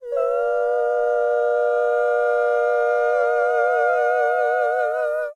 Another Ahh from myself
acapella, male-vocal, vocal, vocal-sample